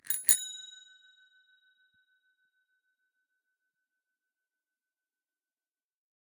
Bike bell 14
Bicycle bell recorded with an Oktava MK 012-01
bell, bicycle, bike, ring